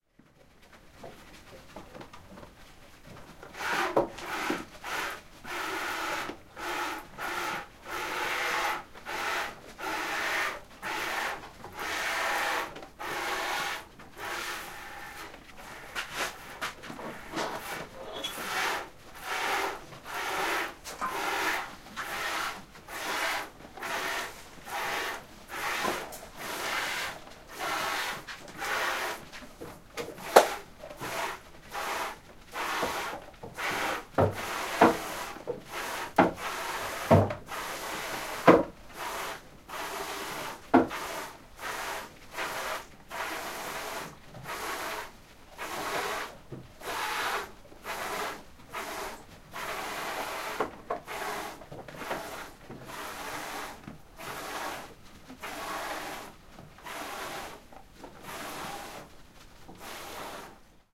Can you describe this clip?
goats milking in plastic bucket
Farmer milking a goat by hand. Bucket is half-full of milk. Goats and bucket stands on a wooden platform.
bucket, chevre, full, Goat, lait, Milking, plastic, plastique, plein, seau, traite